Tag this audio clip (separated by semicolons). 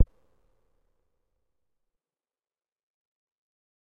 drum-hit; percussion; short; soft; mellow